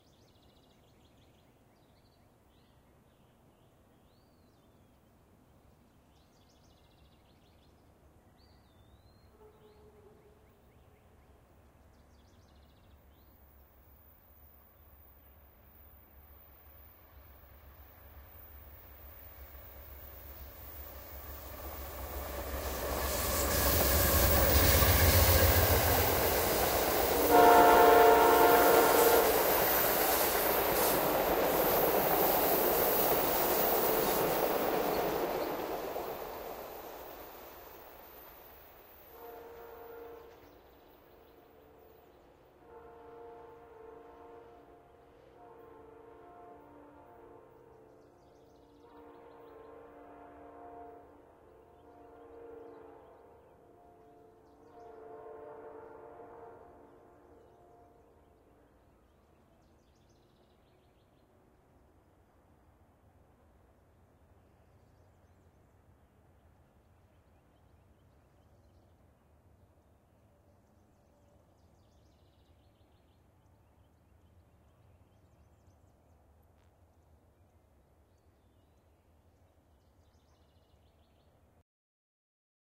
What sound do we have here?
A recording of an Amtrak train going through Cornelia near downtown at 6:00 AM. Goes by pretty quickly.
fast, loud, Public, Transportation, Countryside, Country, Travel, Amtrak, Trains, Free, Locomotive, quick, Transport, Georgia, Train